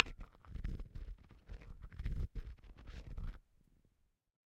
Two small glass holiday ornaments being rubbed together. Low noisy sound. Fair amount of background noise due to gain needed to capture such a soft sound. Close miked with Rode NT-5s in X-Y configuration. Trimmed, DC removed, and normalized to -6 dB.